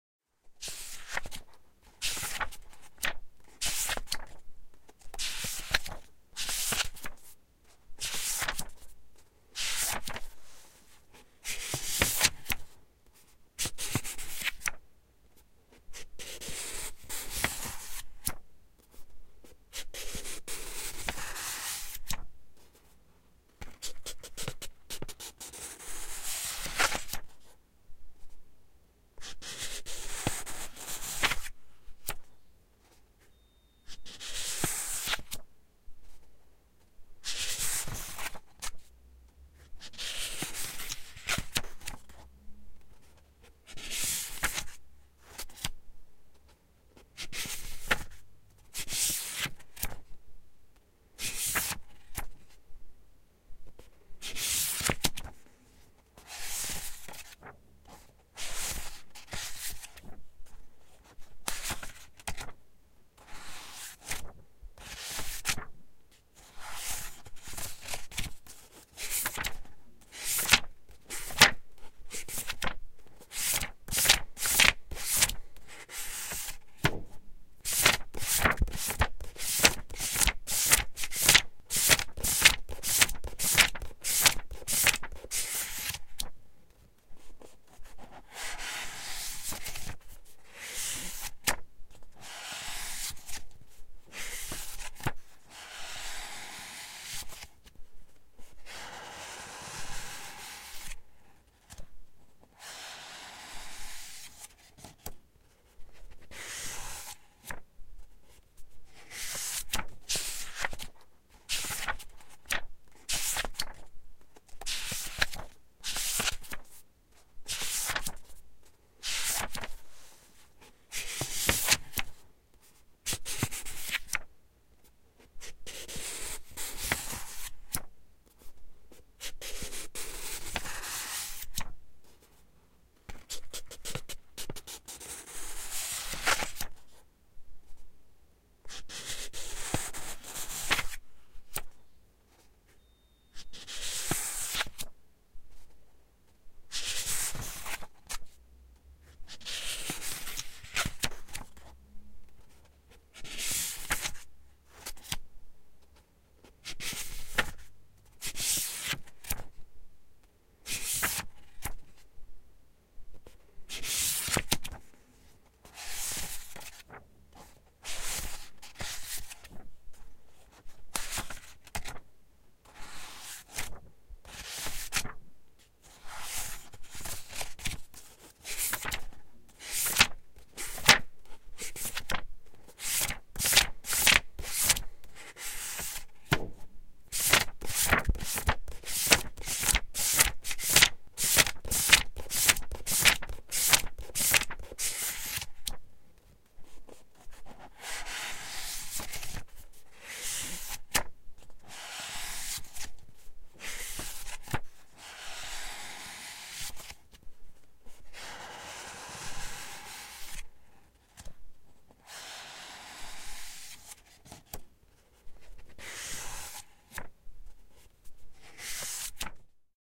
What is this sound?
Flipping through pages 2. Recorded with Behringer C4 and Focusrite Scarlett 2i2.